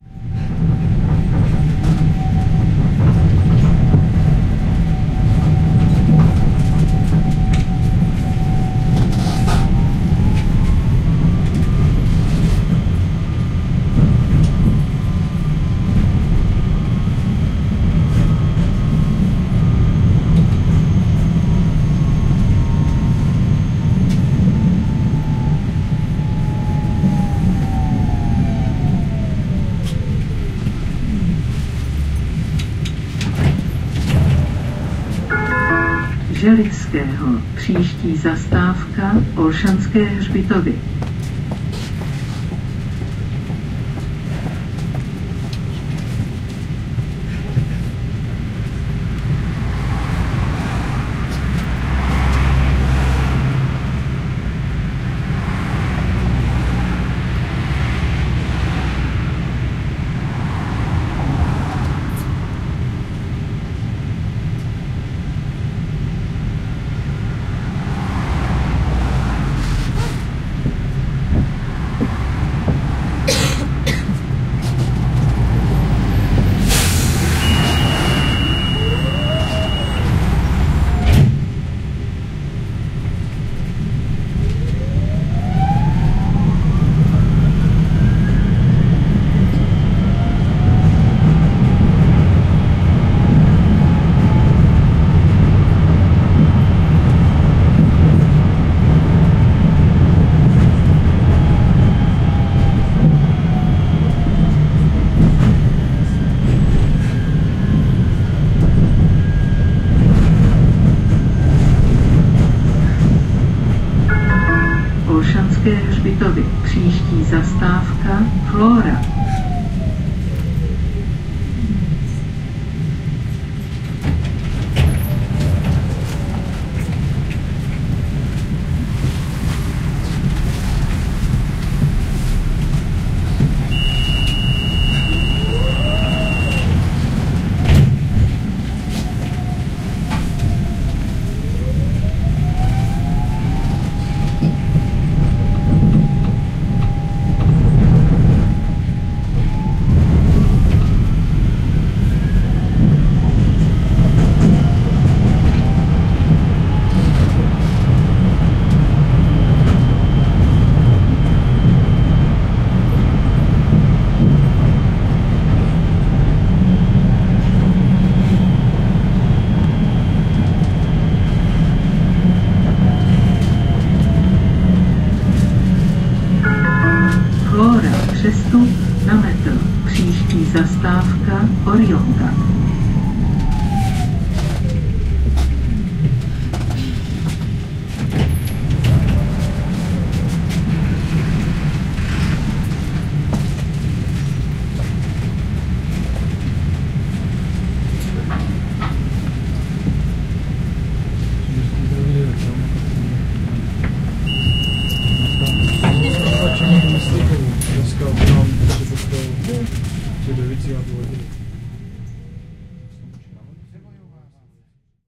TRAM ride inside
Tramvaj Škoda 14T, Prague
inside, ride, tramway, transport